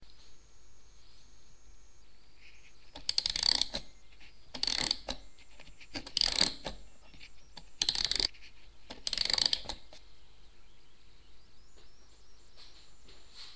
Spieluhr-aufziehen
music-box, antique